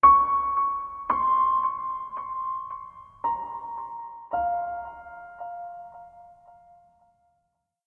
Four descending notes, part of Piano moods pack.
mood, reverb, phrase, piano, calm, mellow